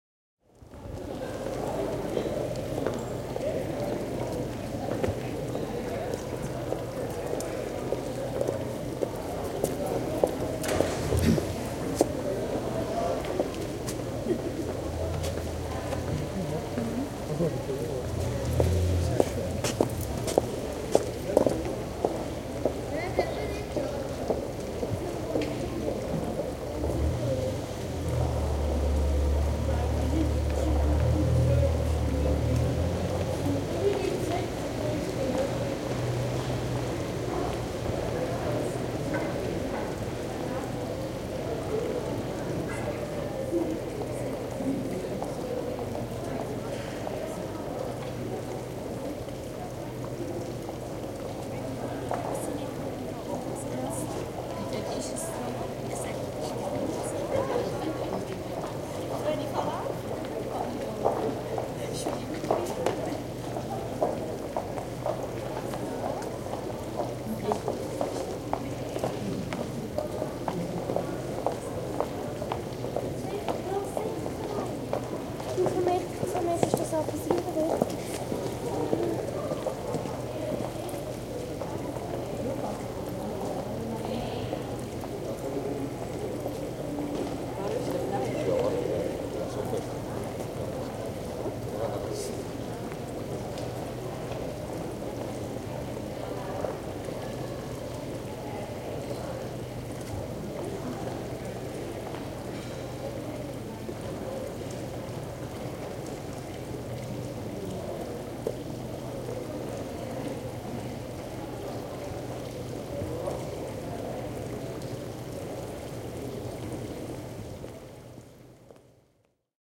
Sveitsi, aukio kaupungissa / Switzerland, a square in a small town, footsteps, some people, distant hum of voices and a fountain
Pikkukaupungin toriaukio. Kaikuvaa, hieman etäistä sorinaa, välillä lähempänä puhetta ja askeleita, vaimeaa suihkulähteen solinaa.
Paikka/Place: Sveitsi / Switzerland / Luzern
Aika/Date: 01.01.1991